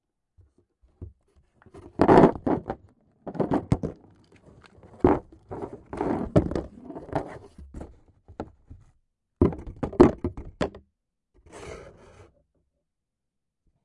UsedBatteries2 falling home Jan2012
In this sound I am dropping used AA batteries (from my field recorder! hehe) into a cardboard box. Recorded with a zoomH2.